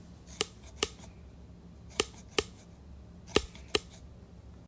clicking plastic tongs repeatedly